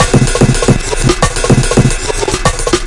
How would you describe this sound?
amen break meak in FL studio 10
with cybeles and amen break sample
amen, noise, studio, postcast, fx, breakcore